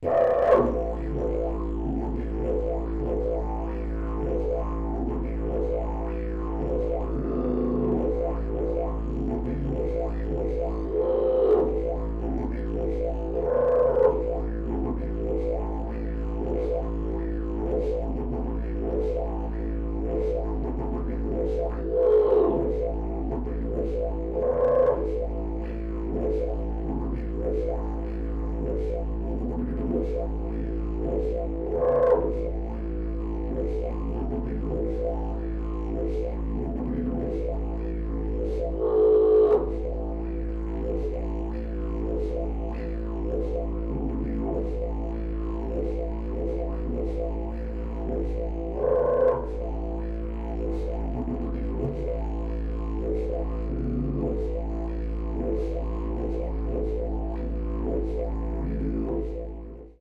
This sample pack contains five 1 minute passes of a didgeridoo playing the note A, in some cases looped. The left channel is the close mic, an Audio Technica ATM4050 and the right channel is the ambient mic, a Josephson C617. These channels may be run through an M/S converter for a central image with wide ambience. Preamp in both cases was NPNG and the instrument was recorded directly to Pro Tools through Frontier Design Group converters.
aboriginal, tube, key-of-a, australia, native, didjeridu, instrument, aerophone, indigenous, australian, didge, didgeridoo, wind, ethnic